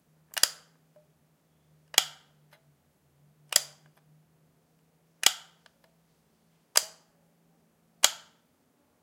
light switch plastic bathroom on off button push clicks2 softer
bathroom, button, clicks, light, off, plastic, push, switch